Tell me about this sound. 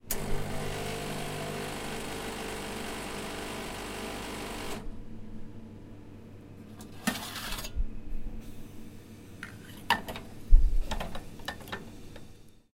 sounds of coffee machine in Swieta Krowa Pub
Zoom H4